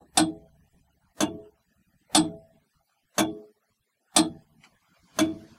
Grandfather Clock Close
A Grandfather (long case) clock recorded very close to the dial, with lots of clockwork sound coming through.
Recorded with an RV8 large diaphram condensor mic.
Clock, Grandfather-Clock, Tick